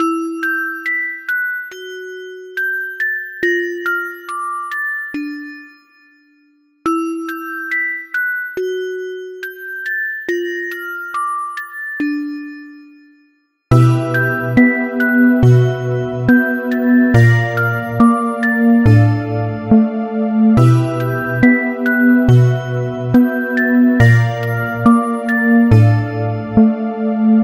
salade de saison
8 bar gloomy, miserable, and morose loop (70 bpm) with some hope and promise. Pitful music with glokenspiel (or vibraphone or maybe something else) and synth.